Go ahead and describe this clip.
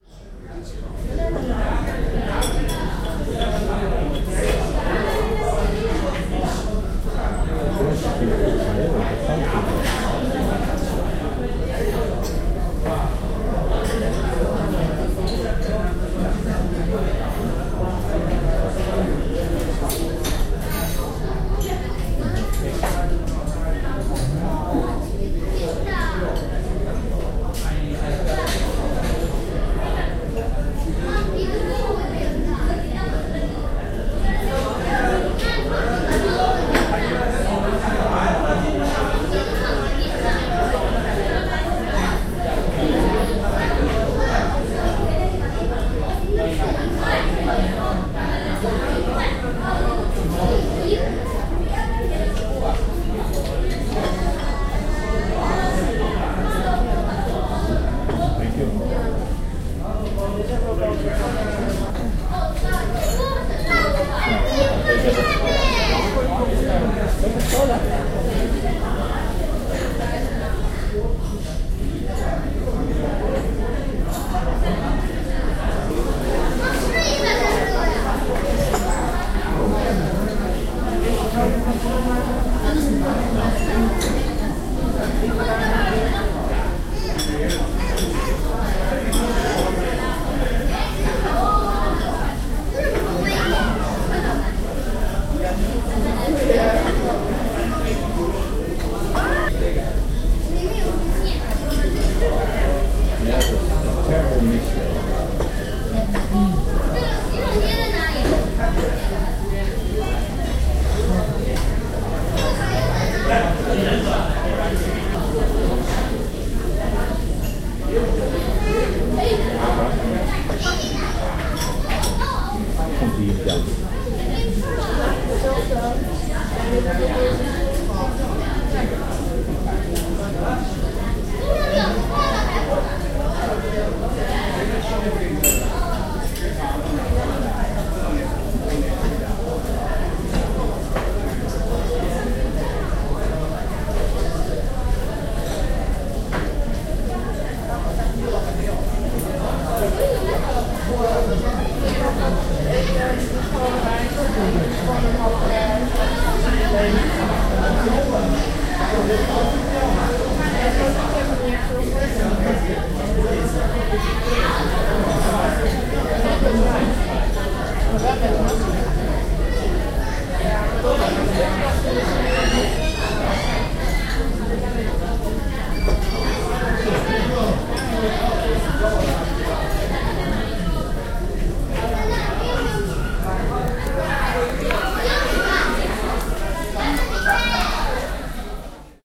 Restaurant Suzhou China
Restaurant ambiance in Suzhou, China.